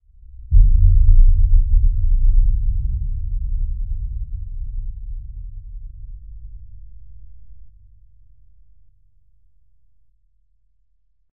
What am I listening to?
explosion sourde
Very low-pitched explosion for dark atmosphere.